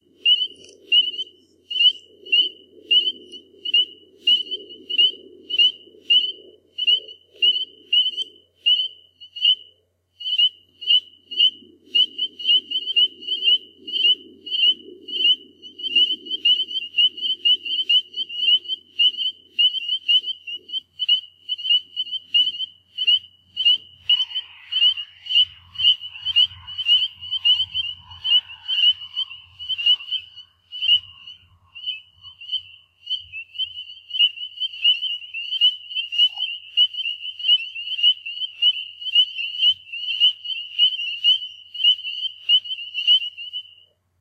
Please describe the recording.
Sample of spring peepers in a small pond. Slight road noise in the background. Recorded with internal Macbook mic using Audacity.